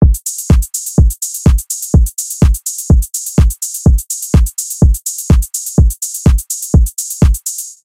Simple House Drum Loop (125 BPM)
drums, house